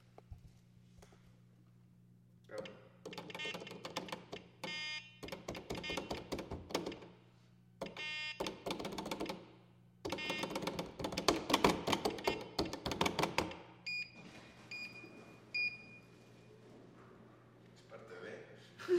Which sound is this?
Botones deseperación: flurried
hall, room, buttons, press, flurried, elevador